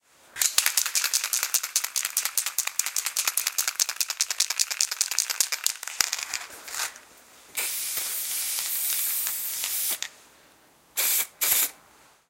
Shaking spray box and spraying
Shaking a box of paint spray and spraying.
a, box, graffiti, paint, shaking, spray, spraybox, spraying